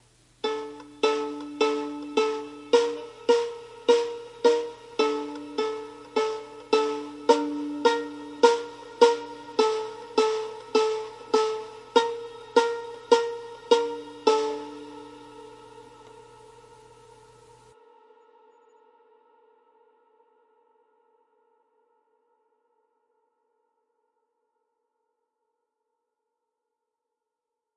Plucking my violin close to a snare drum so it rattled.